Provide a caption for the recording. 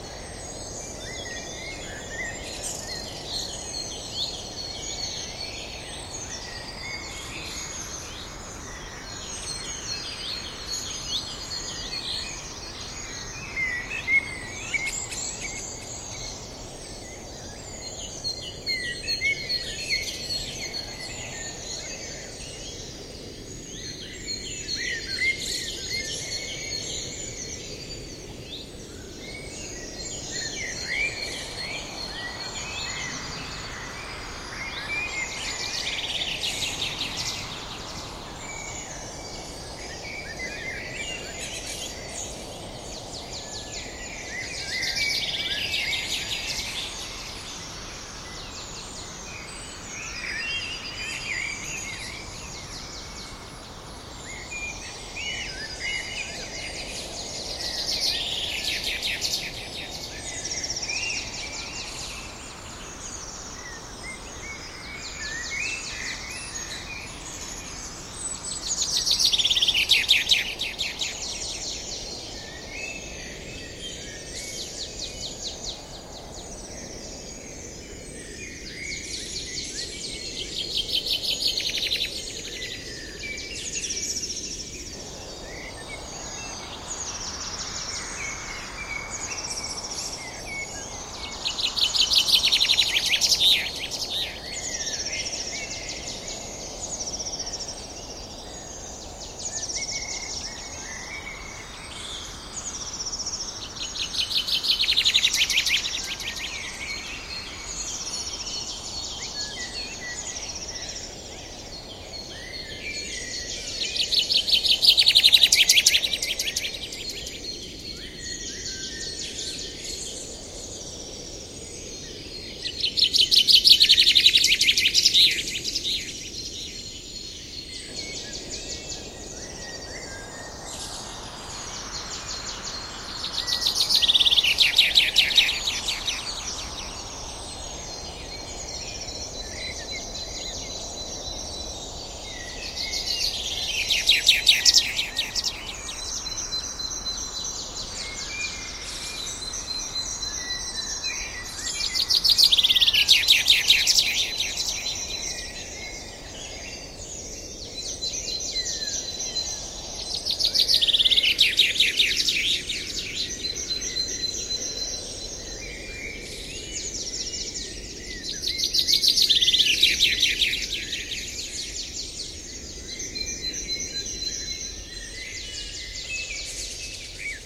forest sound mixed with audacity